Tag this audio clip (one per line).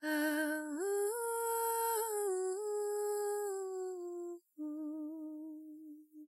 girl
vocal
female
non-reverb
clean